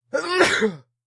Sneeze, Single, C
Raw audio of a single, quick sneeze. I had the flu, might as well make the most of it.
An example of how you might credit is by putting this in the description/credits:
The sound was recorded using a "H1 Zoom V2 recorder" on 19th November 2016.
cold, ill, flu, sneezing